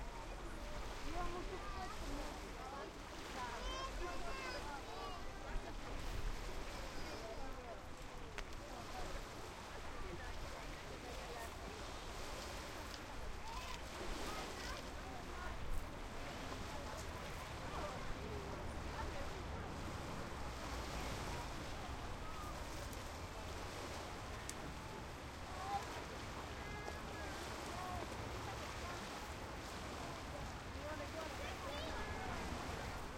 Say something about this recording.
Sea Beach People Preluka Rijeka--
recording of beach
sea, rijeka, people, preluka